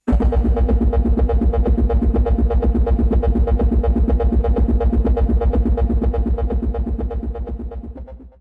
weird synth sound effect for intro or video game
fun, weird, new-age, synthesized, low, bass, intro, ambient, loop